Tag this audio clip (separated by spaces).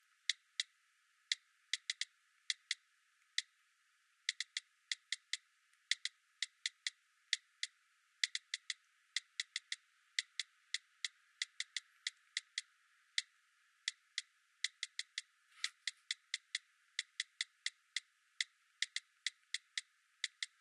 cellular cell phone typing telephone mobile smartphone